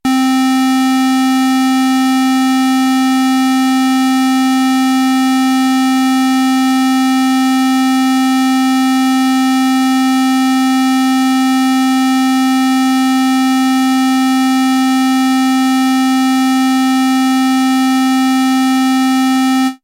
Mopho Dave Smith Instruments Basic Wave Sample - SQUARE C3
basic, dave, instruments, mopho, sample, smith, wave